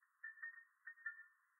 PAILLERY Celtill 2013 2014 son2
Sound recorded and fixed with Audacity to create a light (neon lamp) which is switching on.
It has been recorded in a bathroom with a dynamic microphone pretty much close to the neon lamp (about 10 centimeters).
Applied effects : noise removal (10dB), equalization (amplification down before 1kHz and after 2kHz and a bit up between), reverb (room size : 10%, reverb : 30%)
Typologie : itération variée
Morphologie :
Masse : groupe de sons
Timbre : brillant
Grain : rugueux
Allure : le son ne comporte pas de vibrato
Dynamique : attaque douce et graduelle
Profil mélodique : variation scalaire
Profil de masse : site
lamp, lamp-tube, light, lighting, lightness, light-tube, neon, neon-tube, tube